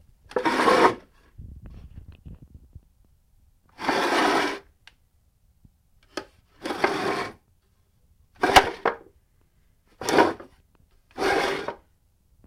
wooden chair skoots
wooden chair scoots along the floor ... yes... this is a series of chair scoots. Might go well with the gasps I uploaded earlier... finish with a glass being broken, and a cat howl... but I digress
SonyMD (MZ-N707)